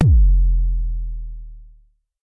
MBASE Kick 06
i recorded this with my edirol FA101.
not normalized
not compressed
just natural jomox sounds.
enjoy !
analog, bassdrum, bd, jomox, kick